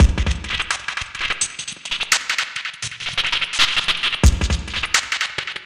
Glitch Drum loop 8b - 2 bars 85 bpm
Loop without tail so you can loop it and cut as much as you want.
percussion, drum, percussion-loop, glitch, beat, electronic, rhythm, loop, drum-loop, groovy, drums